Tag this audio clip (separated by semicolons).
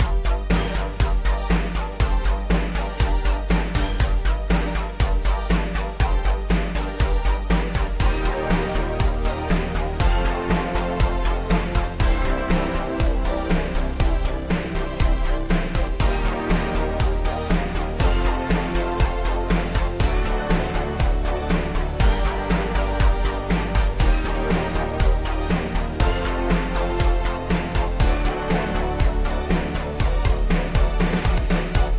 80; 80s; electronic; loop; loops; music; retro; s; synth; Synthwave